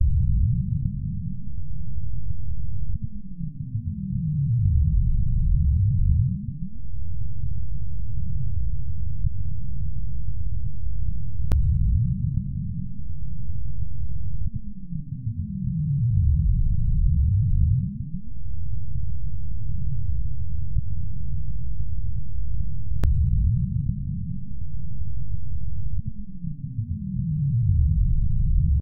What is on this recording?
An effect heard in Mosquitmosphere 03... All sounds were synthesized from scratch.
sfx, raw, hollow, insects, dry, minimal, fx, silence, atmosphere, noise, minimalistic